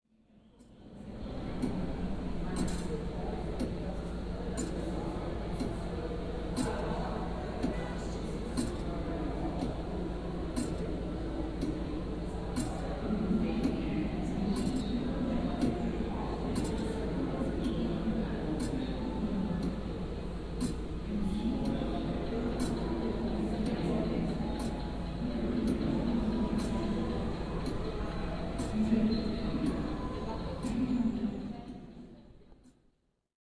FR.EinsteinOnTheClock.040
antique-clock, field-recording, sound, zoomh4